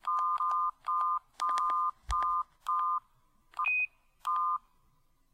SiemensM55-keybeep
Physical + beeping sound of clicking cellphone keys. Physical popping sound + electronic (DTMF-like) beeps.
Siemens M55 mobile phone recorded with a RØDE Videomic from close range. Processed slightly for lower noise.
beep, click, dtfm, key